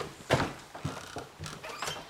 Screen Door Closing
Screen door on a front porch closings.
closing, door, Screen-Door